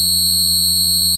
industrial scape2
A Casio CZ-101, abused to produce interesting sounding sounds and noises
12bit, alias, casio, cosmo, crunchy, cz, cz101, digital, glitch, synthesizer